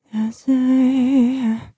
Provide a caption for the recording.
voc snippets 1 note (3)
separate female vocal notes
vocal, female, voice, sing